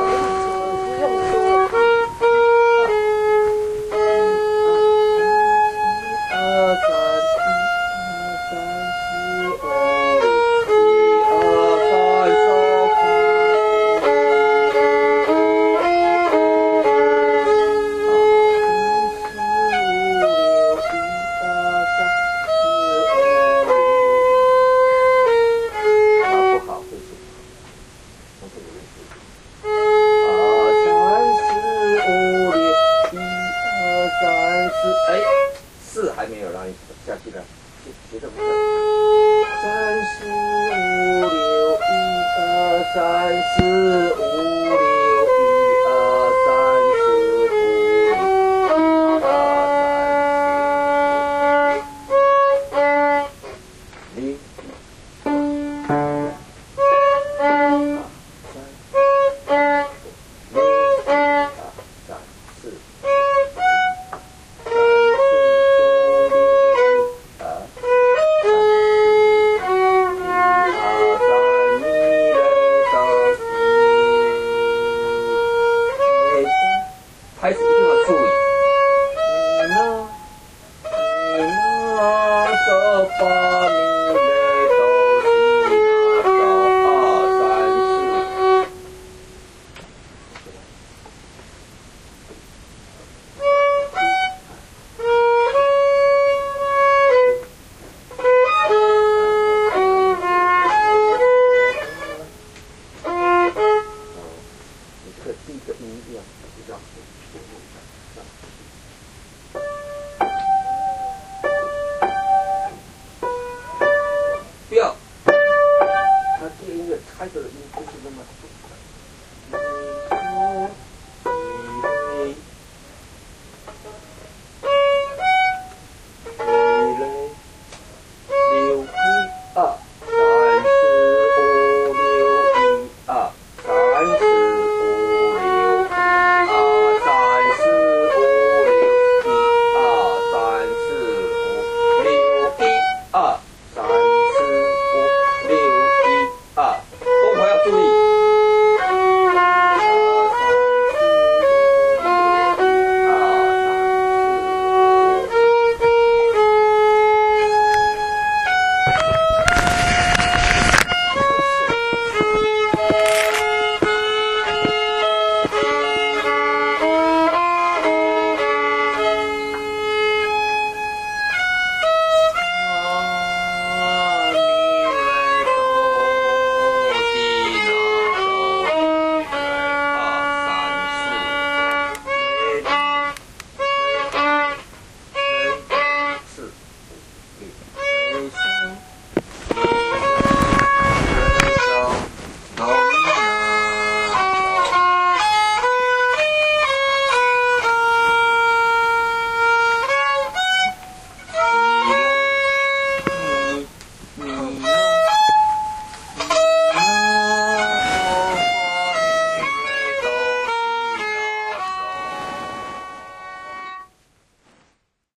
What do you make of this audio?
kid practicing violin under the supervision of an elder teacher. gulang-yu island southern china
violin, gulang-yu, field-recording